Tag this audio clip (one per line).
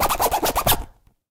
0
egoless
natural
noise
scratch
sounds
vol
zipper